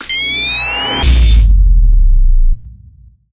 Electric Charge + Shot
Charges up for 1 second and then releases in a boom.
charge, gun, shoot, compilation, electric, charging, weapon, firing